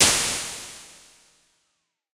Alesis Microverb IR Small 5
Impulse response of a 1986 Alesis Microverb on the Small 5 setting.